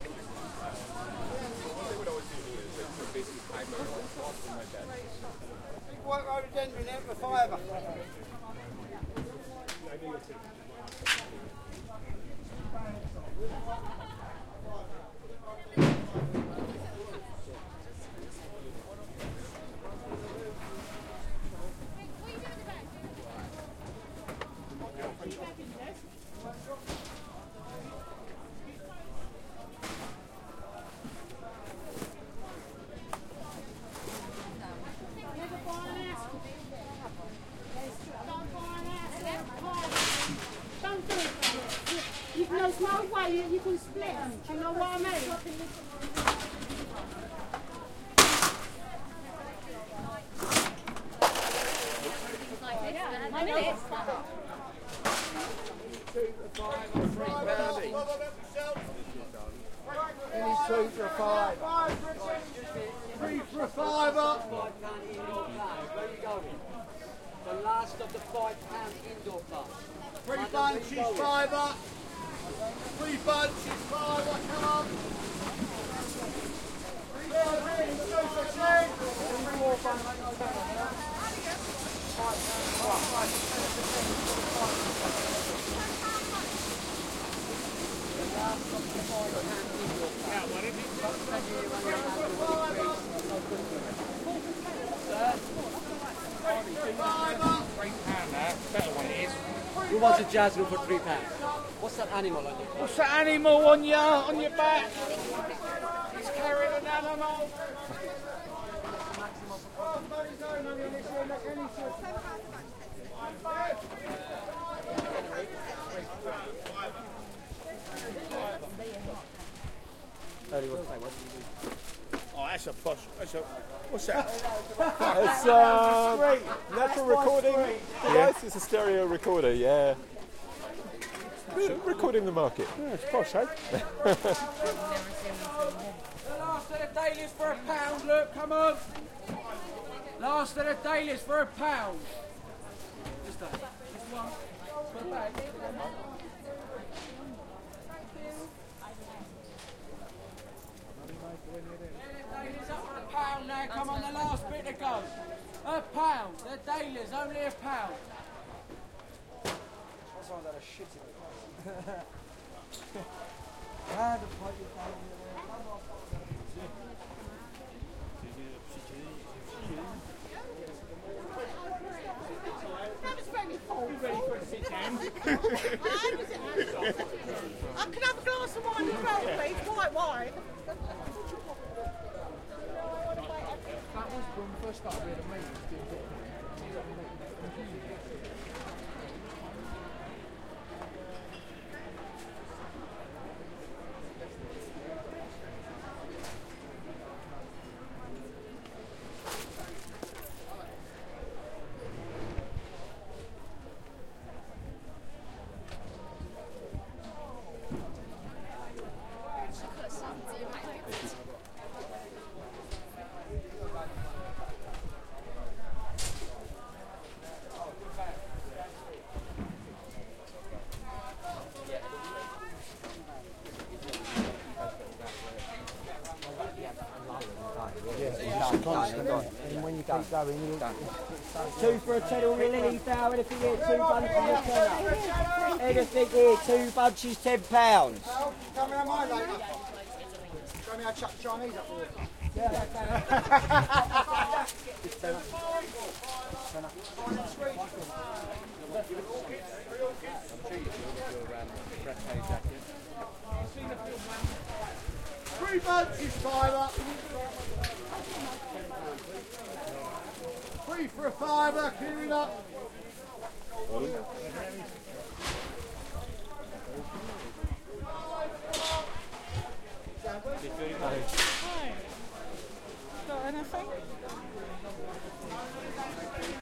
Flower Market Columbia Road
An ambient recording of Columbia Road Flower Market in London made on May 8th 2016 using a Tascam DR 40.
cockney, ambience